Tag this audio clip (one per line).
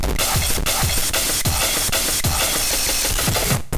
acid
breakbeat
experimental
idm